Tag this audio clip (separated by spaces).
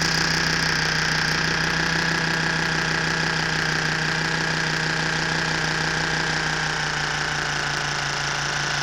lumps music movie toolbox fragments melody bits